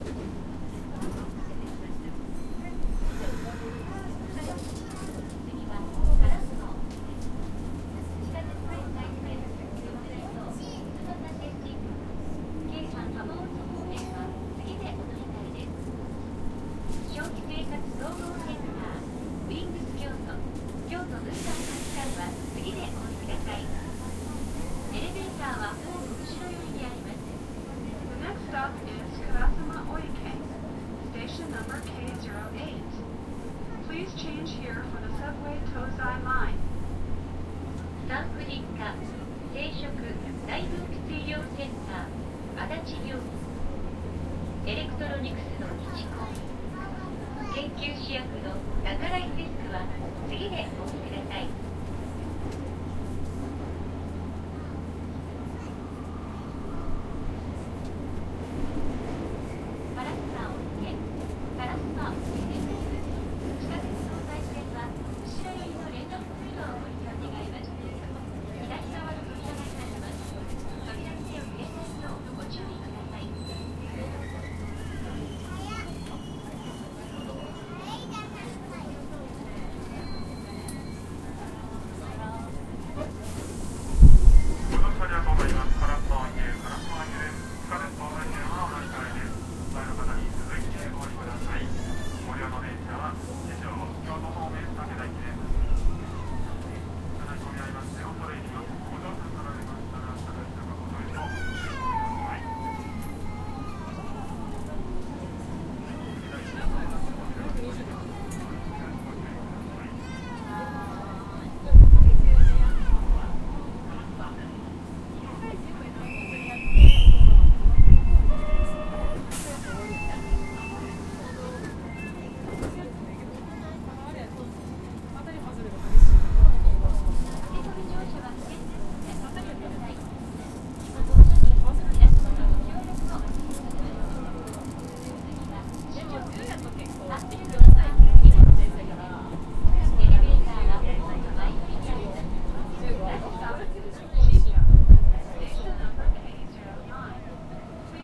Subway Kyoto interior
Recording I made inside the subway of Kyoto, Japan. Recorded with A Zoom H5
kyoto, ambiance, train, field-recording, subway, japan, people